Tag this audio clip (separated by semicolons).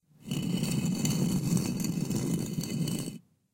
crypt; door; hidden; open; pyramid; scrape; stone; temple; tomb; wall